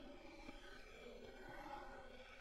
Scratch between a block of wood and a table of metal. Studio Recording.
scratch, metal, wood, interaction